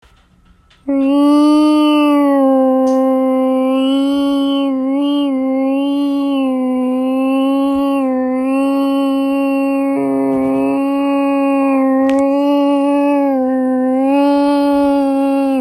the sound of a person mimicking an alien ship for comedic purposes